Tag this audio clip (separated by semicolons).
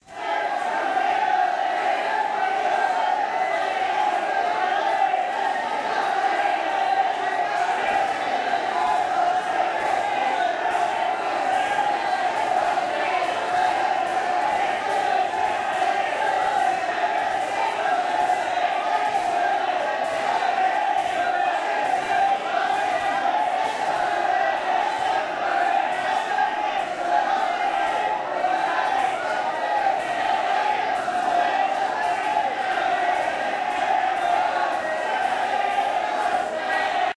angry; riot; crowd; noisy; Prison; loud; people; rowdy